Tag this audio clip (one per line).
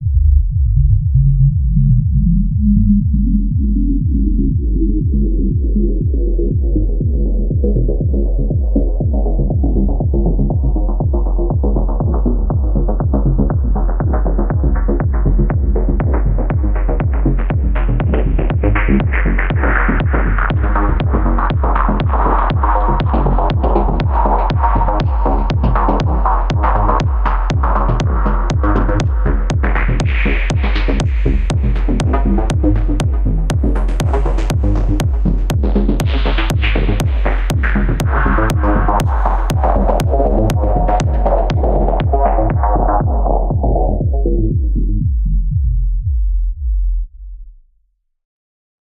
Music
Synth
Loop